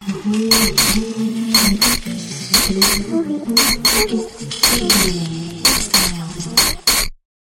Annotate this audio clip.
Just crazy looping that I made just for fun, last year, when I worked with independent film makers.
Original sound was recorded by me using Roland R-44.
You can use it for alarm or receipt for cellphone or anything.
crazy, alarm, clock, loop